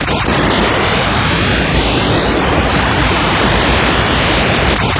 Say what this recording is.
Random noise generator